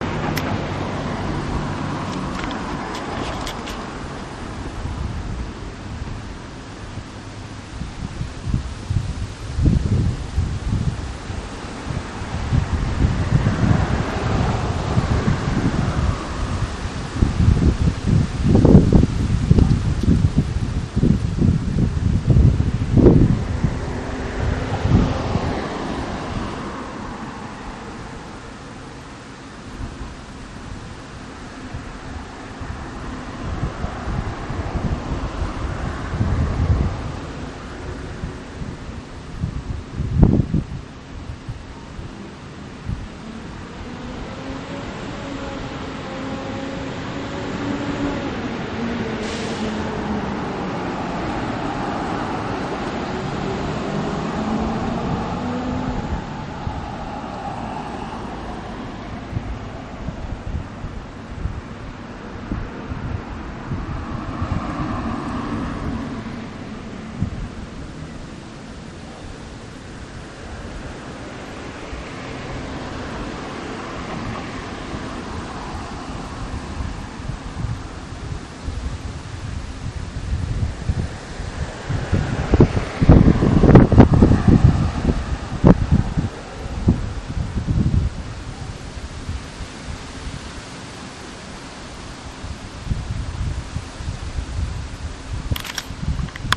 made with my Sony Audio Recorder at work one day. I was outside and decided to record the ambience of the neighborhood that surrounds my work.